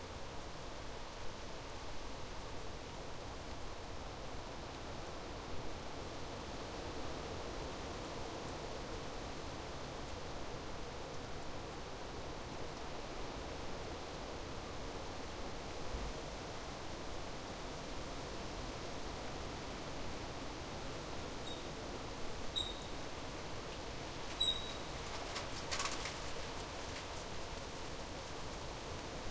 Windy autumn - bicycle arriving and hand-breaking three times and going up the curb
A bicycle arriving and hand-breaking three times and going up the curb on an autumn windy day.
autumn, bicycle, bike, breaking, curb, hand-break, pavement, squeak, squeaky, wind, windy